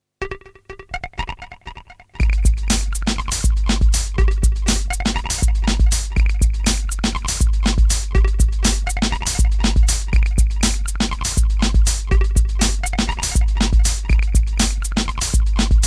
beeps edit
An effect I found on my Zoom bass effects system while playing notes on
the bass guitar, which was taken straight into my 4-track tape machine.
After that, in the studio, there was a drum pattern added using Reason
(Ellie programmed the pattern).
bass, beeps, clicks, effects, processed, rhythm